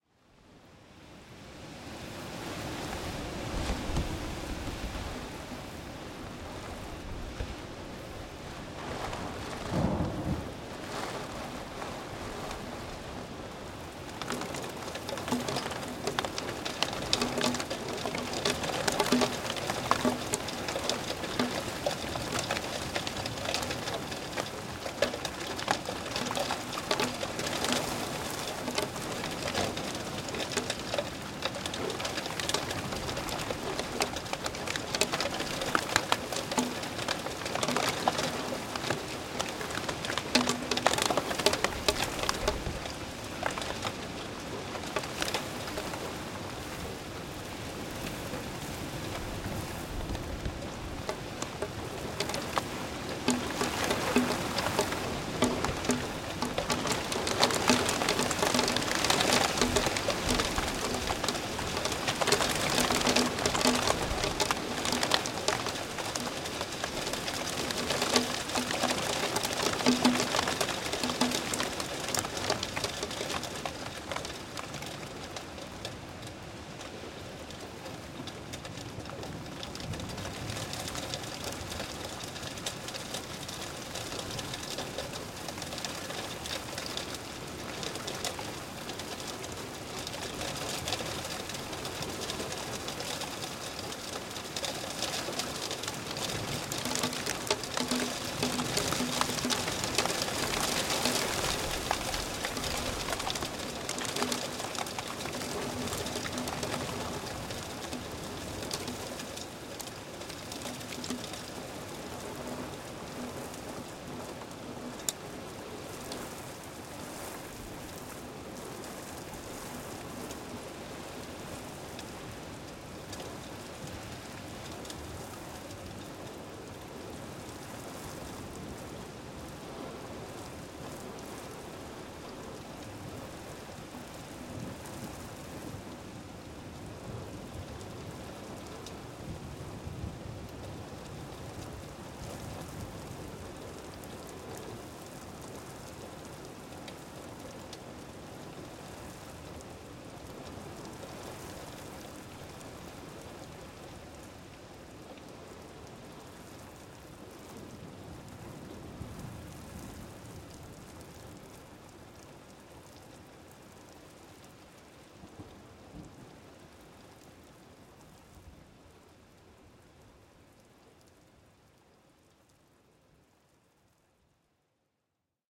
210908 Hail, heavy rain wind, on roof, mono, TORONTO 3am
Hail on roof, windy storm, mono. Audio Technica AT875r.
hail, rain, storm, weather, wind